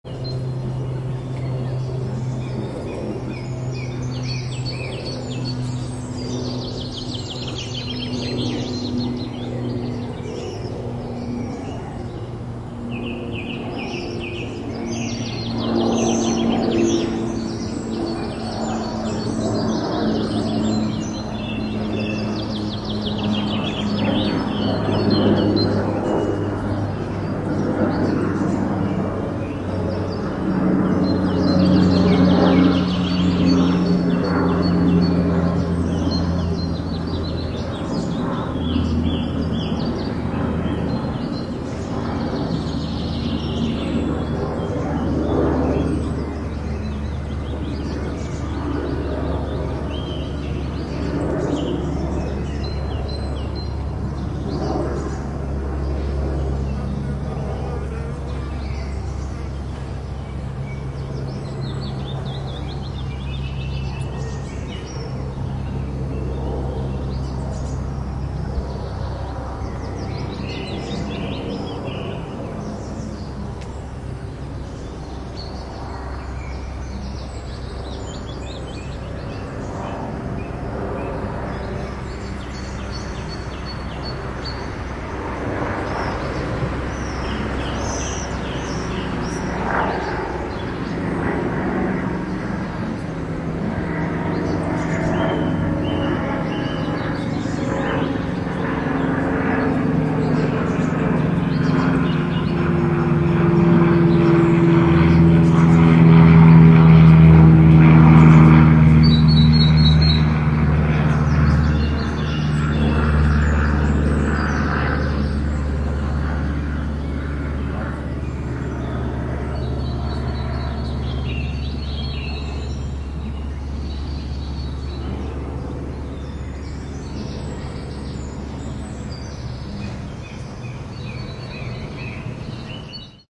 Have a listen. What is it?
birds and planes Olympus LS3 Vogelgezwitscher mit Flugzeugen
a short ambience sound